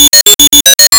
fast-high-pitch-beeps
fast high pitch beeps. made with audacity. Made from a DTMF tone.
editable, audacity, artificial, dtmf-tones, pitch, fast, quick, beeps, loud